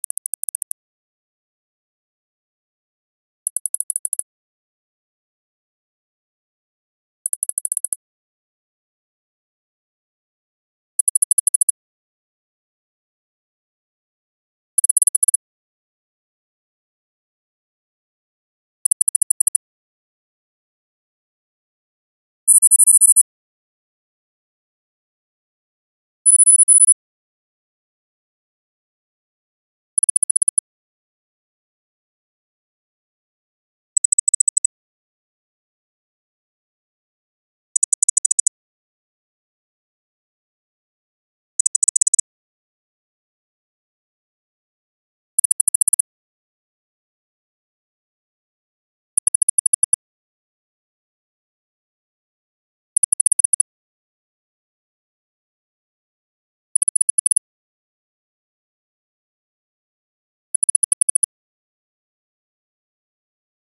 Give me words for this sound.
UI sounds pt1
A sound inspired from some technological/science fictional UI interface.
Sounds are created using SuperCollider programming language and FM technique.